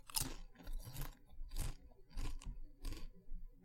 Eating Sound
Me eating potato chips. Recorded in an amateur recording studio(box with a flat sheet as padding), with a Sentey USB Gaming Headset Microphone, and edited in Audacity.
chewing, bite